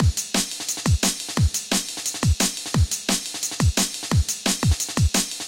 dnb beat 2 Normal 175
Typical drum'n'bass loop with punchy kick & snare with amen break in the back.
bass, break, beat, dnb, dance, drum, jungle, loop, processed, 2step